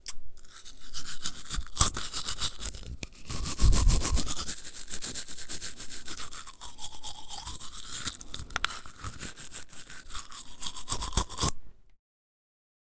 Brushing teeth
dental, cleaning, water, hygiene